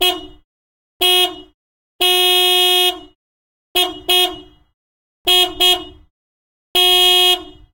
Renault Master F3500 dCi135 Foley Horn Outside Mono
This sound effect was recorded with high quality sound equipment and comes from a sound library called Renault Master F3500 dCi 135 which is pack of 102 high quality audio files with a total length of 103 minutes. In this library you'll find various engine sounds recorded onboard and from exterior perspectives, along with foley and other sound effects.
automobile, bus, car, city, diesel, doppler, engine, exterior, horn, master, outside, pass, passby, renault, road, street, traffic, truck, van, vehicle